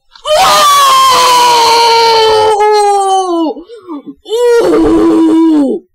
longerest OOOH
(LOUD!)
lol i record my voice while playing video games now so that i can save certain things i say, you know, for REAL reactions to use for cartoons and stuff. a lot of my voice clips are from playing games with jumpscares, and that's where my screams and OOOOOOOHs come from. i used to scream ALL the time when playing jumpscare games, but now it's turned into some weird growl thing or somethin, i dunno. so yeah, lots of clips. there are tons of clips that i'm not uploading though. they are exclusively mine!
and for those using my sounds, i am so thrilled XD
english, exclamation, female, jump, scare, scared, speak, startled, talk, voice, woman